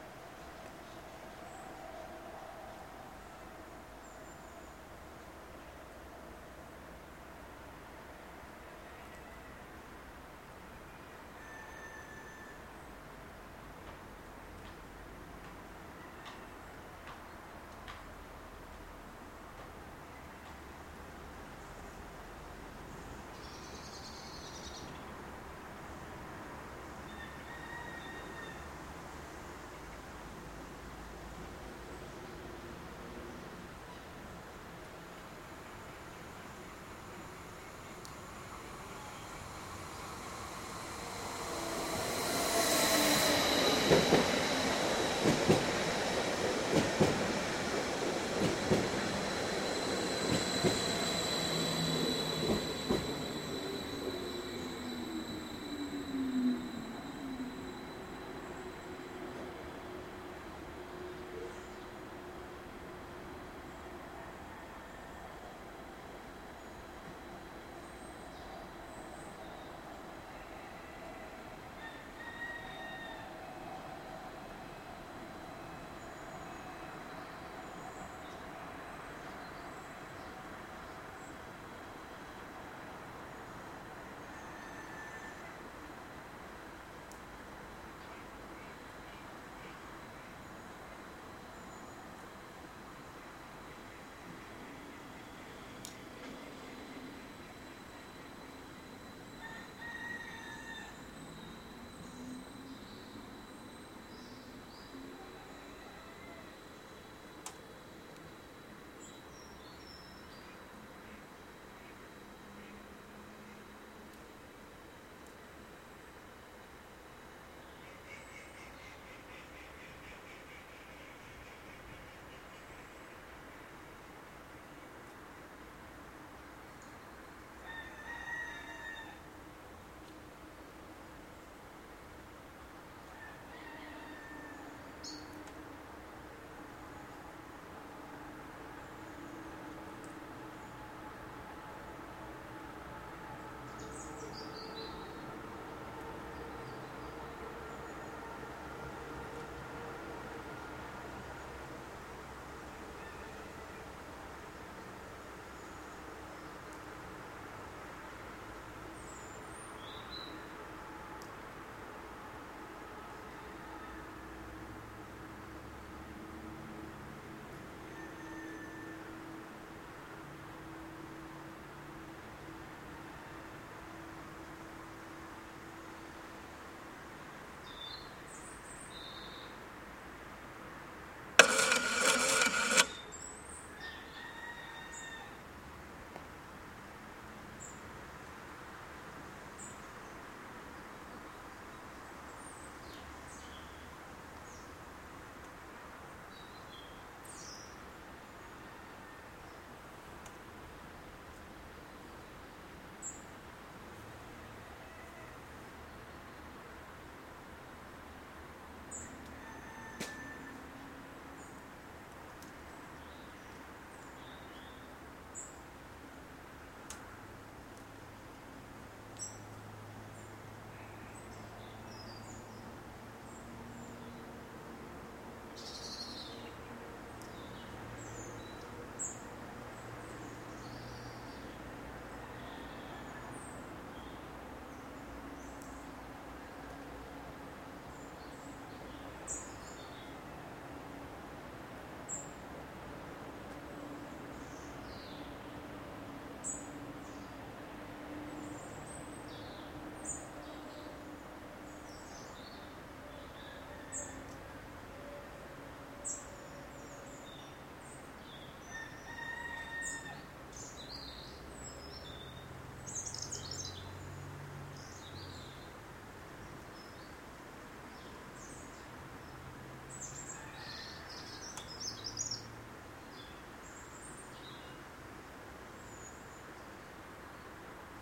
Chicken and other birds sounds covered by heavy traffic noise.
No people.
Train arriving and departing.
Ticket stamping machine noise at 3:01
Recorded on mobile phone Huawai Ideos X3
with Recforge Pro app.